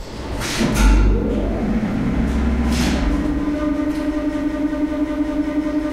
An old Soviet/Russian elevator running on low speed.
Before an elevator reaches the stop point it enters precise stop point when its motor switches to the lower speed. When it runs on low speed it produces pretty industrial sound.
This is elevator nr. 1 (see other similar sounds in my pack 'Russian Elevators')
elevator, engine, hum, industrial, machine, mechanical, motor, noise